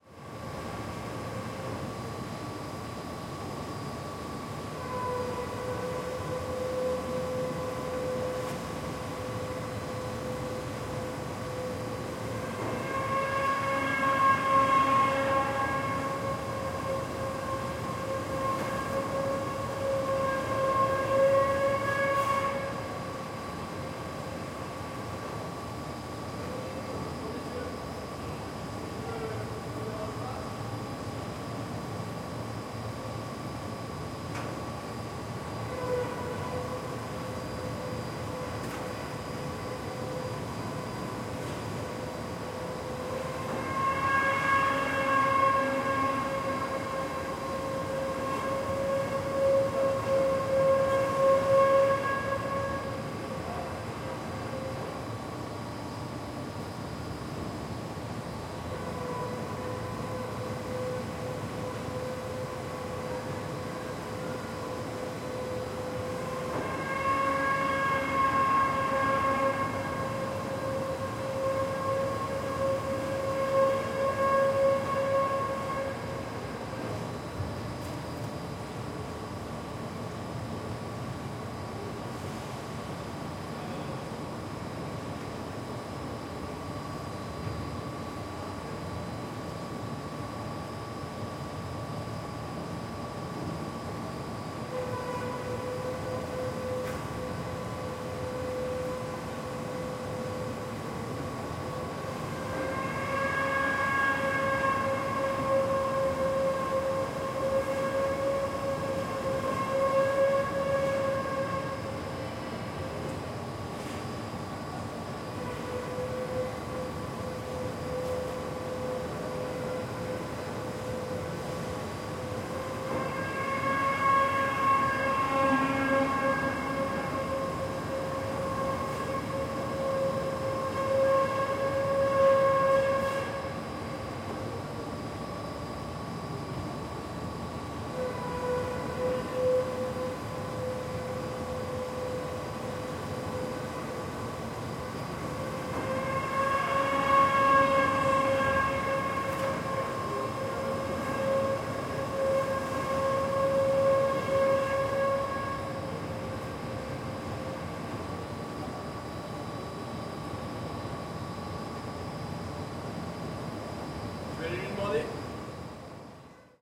Here is a recording of a tannery factory during the drying process of the skins. Some worker talking in the background